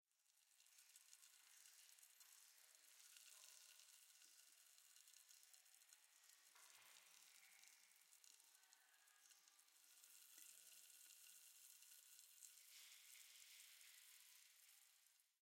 I used a monster energy can, which I shook and then recorded the fizz sound, which sounds really interesting and can be used for boiling pots or maybe as burning flesh.
distortion, OWI